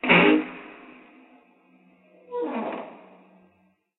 a poorly recorded sound of a heavy metal door